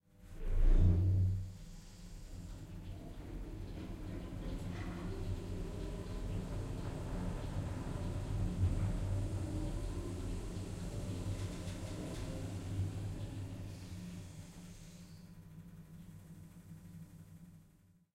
elevator travel 6b
The sound of travelling in a typical elevator. Recorded at the Queensland Conservatorium with the Zoom H6 XY module.
elevator, lift, mechanical, moving, travelling